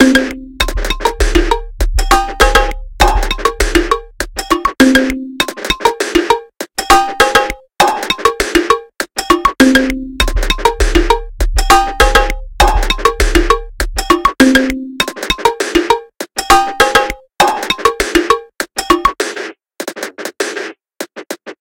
Beat35 (100bpm)
100 bpm of various sounds made into a rythm using Ableton live.
Part of the Beataholic pack.
percussion-loop, drum-loop, rubbish, fraendi, lalli, funky, groovy, rhythm, quantized, bottle, container, percs, larus, beat, gudmundsson, garbage, iceland, 100bpm